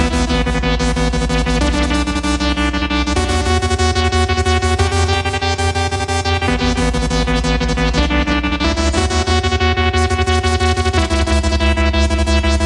elektron runner 2
intense rap run
analog, arp, synth, vst